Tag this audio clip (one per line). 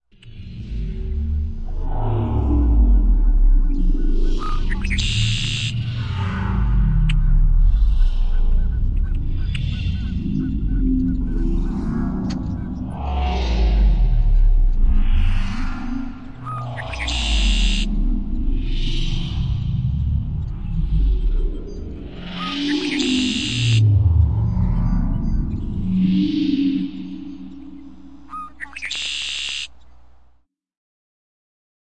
plus
mix
one